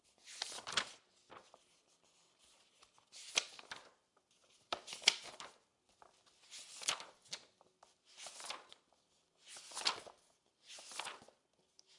Sonido de hojas de papel